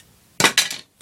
Dropping Wood 2
Various sounds made by dropping thin pieces of wood.